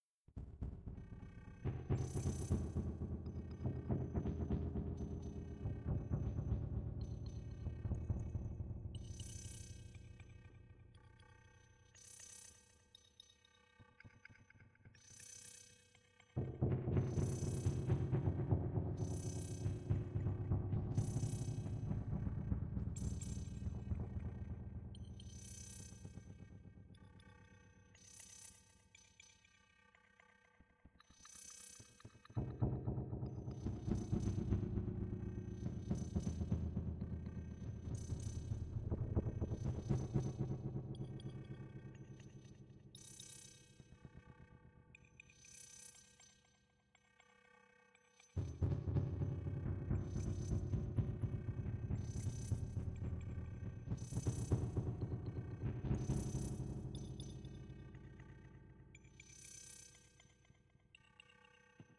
Subtractor Pulsar insanityX4
A sound for the "modular heads"...
Are the fans of analogue bleeps and squeaks destined to forever envy those who can afford the cost and space to have a modular synth? Ney, I say! Hand yourself not to the depthnesses of dispair!
Modular-like sounds are within reach of the common mortals and here are a few experiments to prove it.
In Reason 8, armed only with Reason's most basic synth (Subtractor) and Pulsar (Reason's free "demo" rack extension, which is basically 2 LFOs and an envelope), plus using Reasons reverb machine on multi-tap delay mode.
I did not even have to use the envelope from Pulsar, only the LFOs...
Did not even have to fiddle much with the default preset from Subtractor...
And there is no note playing, MIDI or sequencing involved: All the sounds are triggered by the LFOs fed to Subtractor's gate and CV input.
What could be easier?